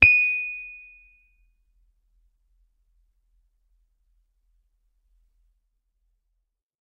C S Rhodes Mark II D#6
Individual notes from my Rhodes. Each filename tells the note so that you can easily use the samples in your favorite sampler. Fender Rhodes Mark II 73 Stage Piano recorded directly from the harp into a Bellari tube preamp, captured with Zoom H4 and edited in Soundtrack.